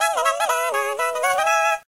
1920s Style Cartoon Motif
I was messing around with Melodyne and did this recording directly into my Macbook mic! It was just to test out a few things but I thought, upload it, what the heck! It might be of use to someone somewhere!
cartoon, chipmunk, 1920s, squirrel